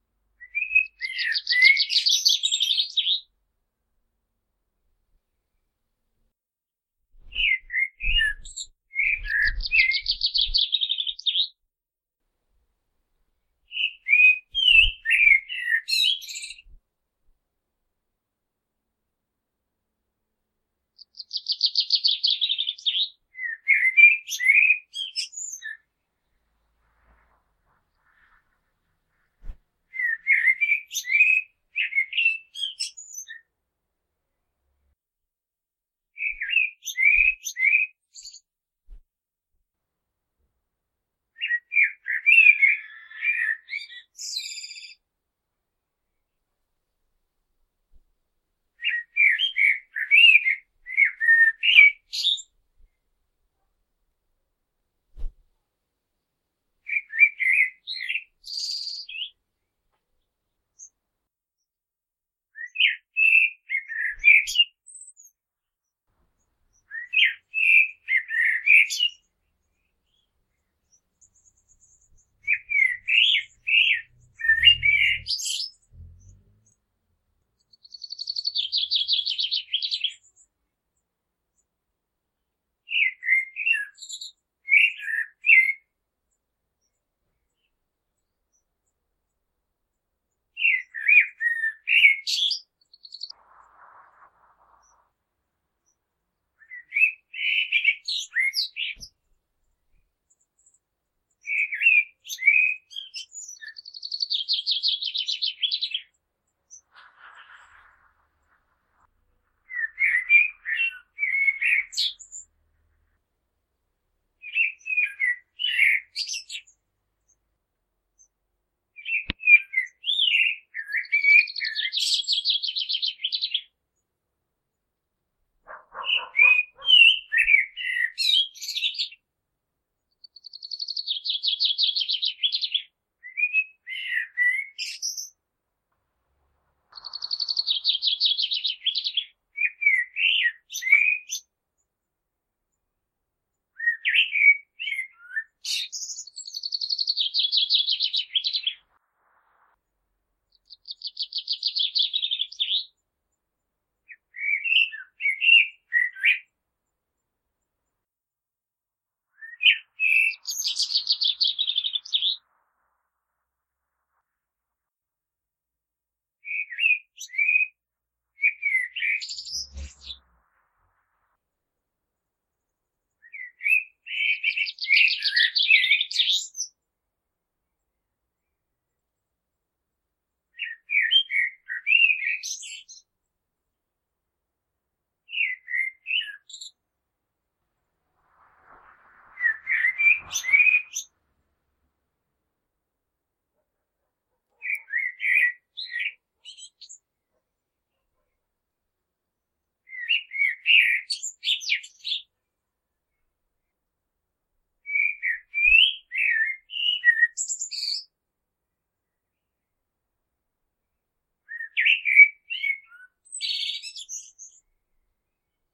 common blackbird 35 songs
Blackbird spring song, H4 recording, denoising with audacity.
Silence between each song, you will find the complete sf2 here:
birdsong, blackbird, H4, nature